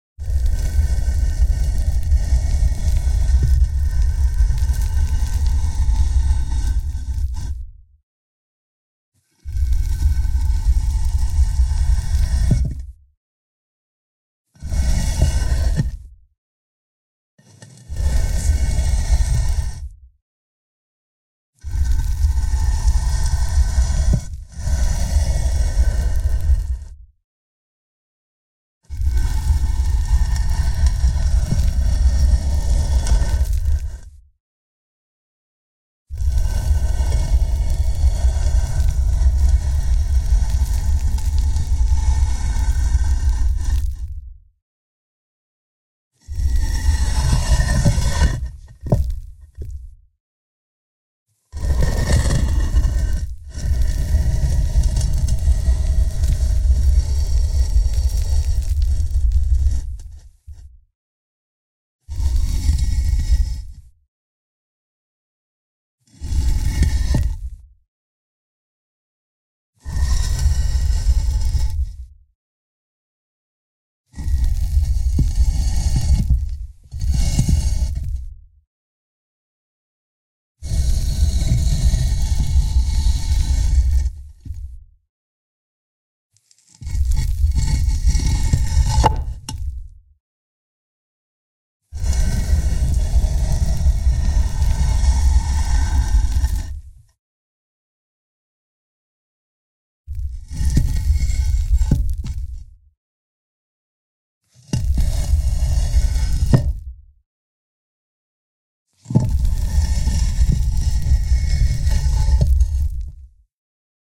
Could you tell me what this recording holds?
Moving the grave stone(3lrs,mltprcssng)
The sound of a gravestone slab being dragged. Various movement variations. Enjoy it. If it does not bother you, share links to your work where this sound was used.
sound, monument-stone, rubble, grave-stone, rumble, scary, movie, tomb-stone, cinematic, sound-design, grave, film, stones, rocks, horror, gravel, pebbles, sfx, sarcophagus, foley, creepy, stone, slab, sinister, tomb, sound-effect, effect, thrill, game